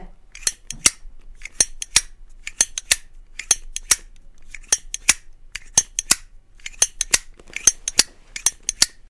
The sound of a Zippo lighter .Only the sound of opening and closing. Very clear and a little fast.